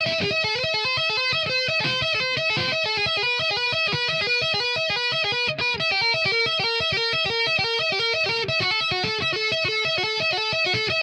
A recording of a typical metal 'hammer-on' guitar riff with distortion.
the
guitar technique hammer-on is done literally by "hammering" down the
string with a left hand finger, often performed in conjunction with a
note first plucked by the right hand on the same string.
I'm still working on mastering this technique.